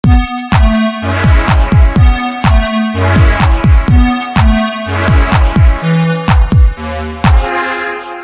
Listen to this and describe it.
Super-Tech-Man
clubby type sound recorded at 125bpm.This reminds me of canoeing at night.